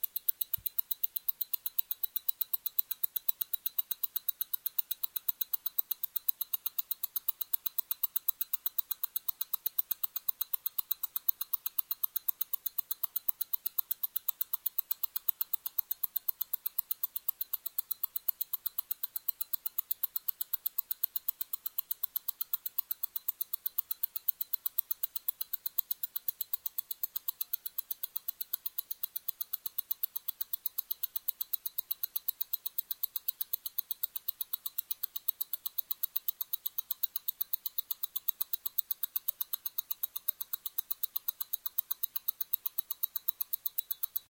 watch-ticking-contact-mic
Recording of an automatic mechanical watch ( Breitling Chronomat GMT )ticking using a contact mic.
Breitling Chronomat GMT.
Oyster Contact Mic
Astbury, Breitling, Chronomat, GMT, automatic-watch, beat, clack, clock, clock-ticking, clockwork, mechanical-watch, passing-time, pulsate, pulse, running, tap, tapping, tic, tick, tick-tock, ticking, ticktock, time, timepiece, wall-clock, watch, watch-ticking